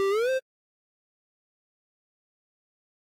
Pixel Sound effect #5
Pixel, Sound, Free